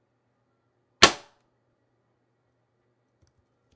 Snap Trap
Mouse trap snapping.
cheese, mouse, ouch, snap, trap